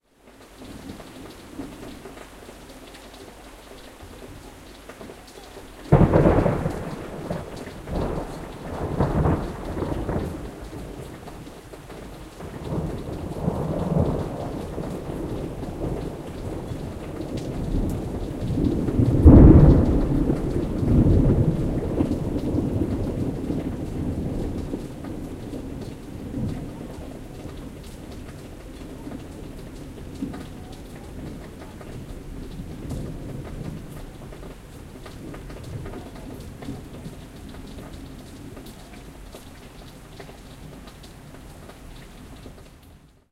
Lightning & Thunder
Some cool thunderstorm sounds I recorded from my appartment window.